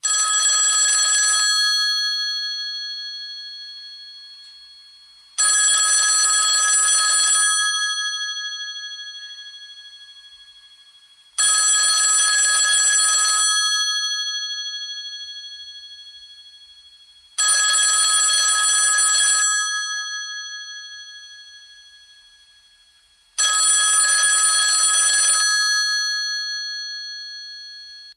FX LuMu cellphone ringtone Huawei Y6 fx old phone
Cellphone ringtone
Model: Huawei Y6
Recorded in studio with Sennheiser MKH416 through Sound Devices 722
Check out the whole pack!
mobile-phone, bells, cellphone, ringtone, phone, Huawei-Y6, old